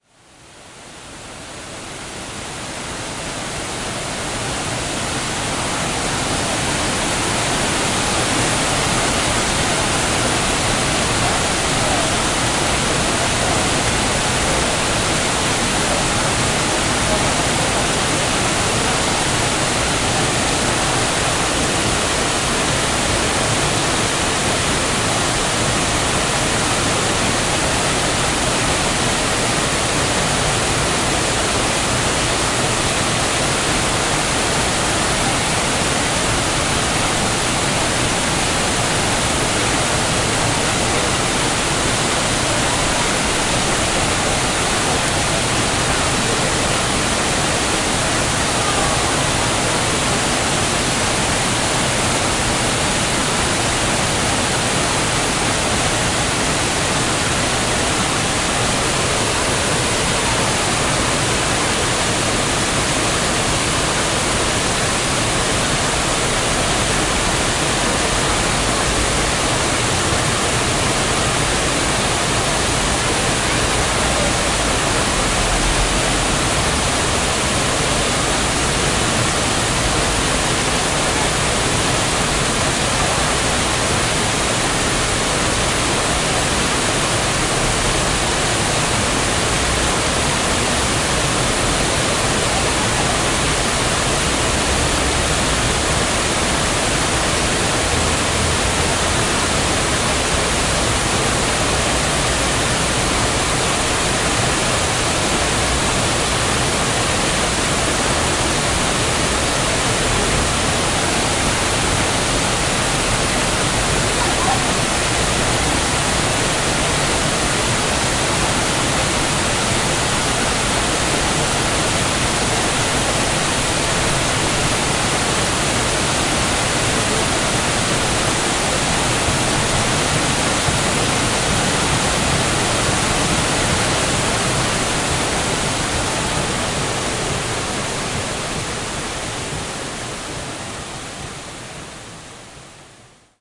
Water fall at Cheonggye Stream.
20120721